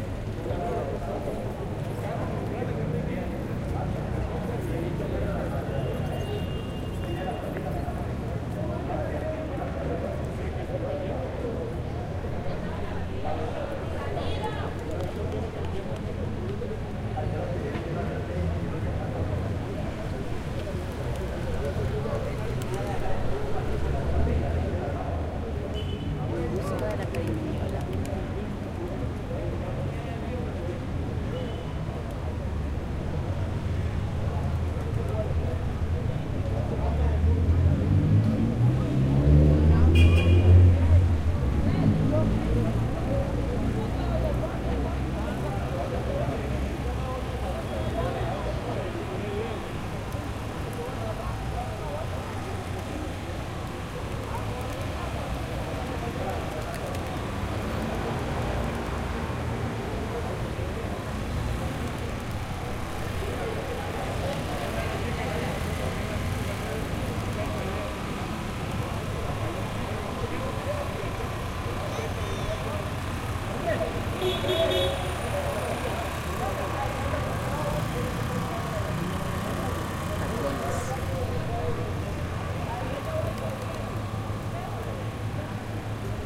Street Santa Marta-Colombia
Recorded at a street in Santa Marta, Colombia
Colombia, Passing, Santa, Street